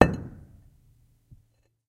stone on stone impact13
stone falls / beaten on stone
concrete, stone